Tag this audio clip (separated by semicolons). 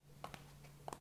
Heel; Step; Woman